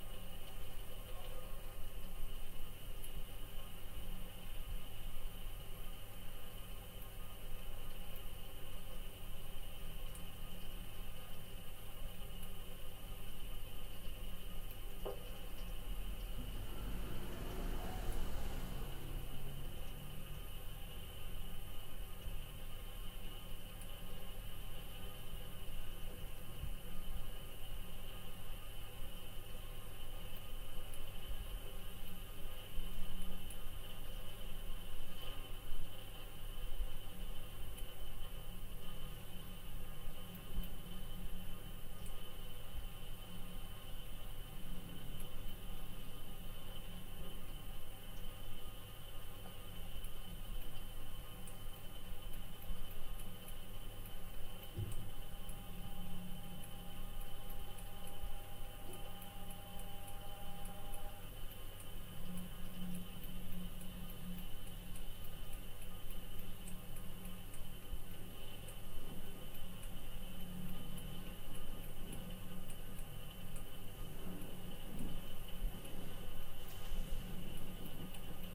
plumbing in tiny bathroom
heat, pipe, piping, plumbing, tubing, warm, warming, water